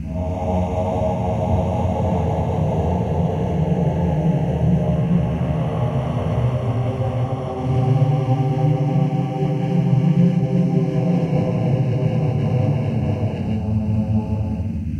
Ohms of three ghosts. Paulstretch and granular scatter processor.
Recorded with a Zoom H2. Edited with Audacity.